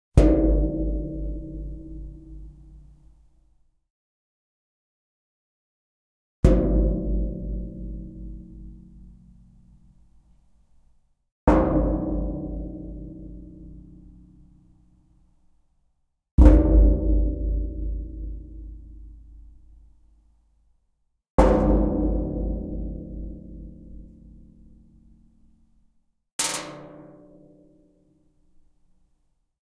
To make these sounds, I hit my knuckles onto a thin sheet of metal on a bookshelf. The last sound on the recording was also made by dropping a coin onto the same spot on the shelf.